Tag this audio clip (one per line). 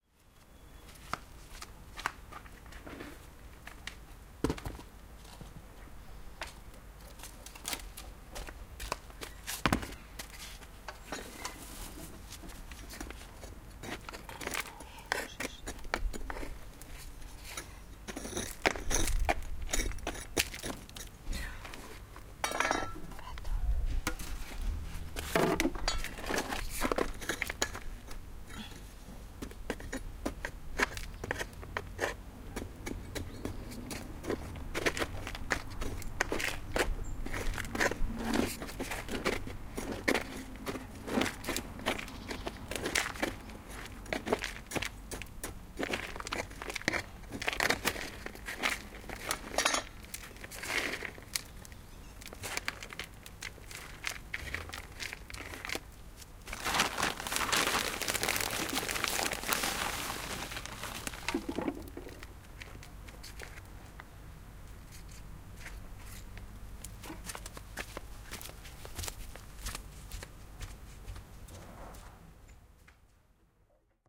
gardening
digging